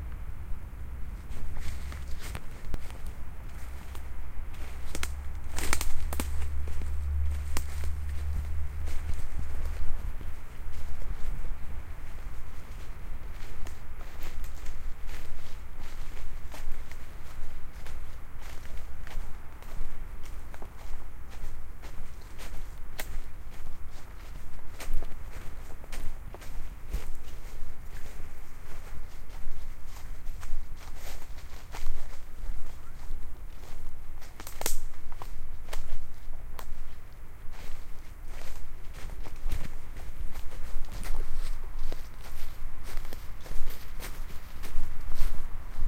Folhas caminho terra
Parque, Porto, Serralves, ambient-sound, natural-park, ulp-cam, vegetation, wet-leaves